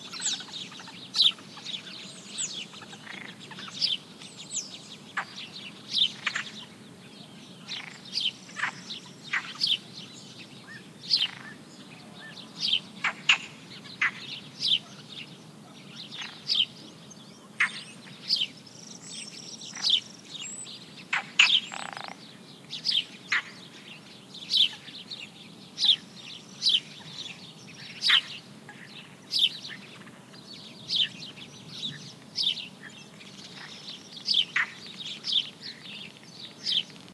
20060326.marshes.sparrow
house sparrows chirping and croaking frogs. Rode NT4-FelMicbooster-iRiverH120(rockbox) / gorriones piando y croar de ranas
ambiance, birds, field-recording, frogs, marshes, nature, sparrow, spring